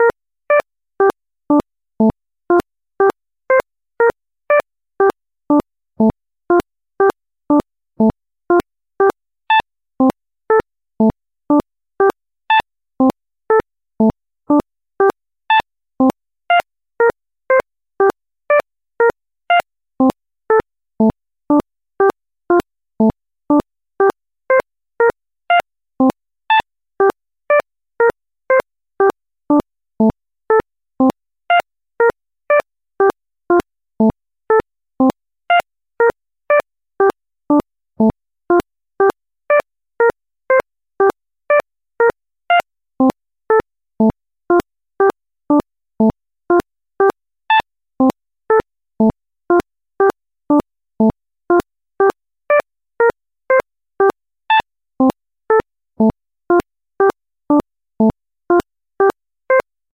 SB Pitches v1
Sound sketch using Markov process to generate a minimal sound scape using 10 sine wave tones at frequencies from the c-minor scale. Slowly and glitchy sounding.
algorithmic, arpeggio, sine, slow, random, csound, click, markov